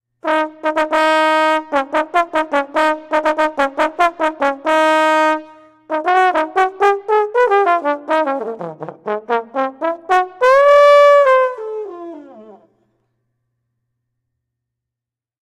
This is just a short fanfare used to announce a king in a short play. After the fanfare the horn player goes into a jazz riff and is stopped. Take 3
The is a recording I made for a fund raiser i am taking part in.
trumpet, trom, fare, royal, horn, fanfair, announcement, king, fanfare, jazz, fan, trombone